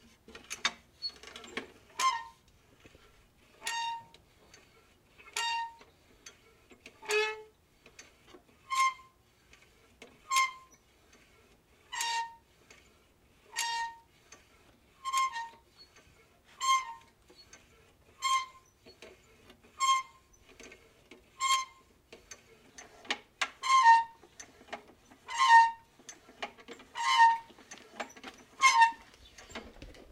Squeaking a metal handle on a lawnmower. Mono recording from shotgun mic and solid state recorder.
squeaky, squeak, metal